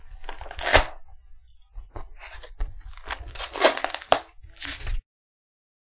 Tearing Book
Used to show someone ripping book in anger or anticipation.